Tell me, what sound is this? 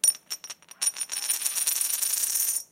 Coin Rolling 3
coin rolling on the floor recorded with TascamDR07
roll, spin, spinning